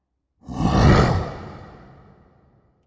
Deep Growl Echo 1
Deep Growl Creature Monster Echo
Creature, Deep, Echo, Growl, Monster